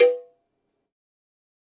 MEDIUM COWBELL OF GOD 035
cowbell, drum, god, kit, more, pack, real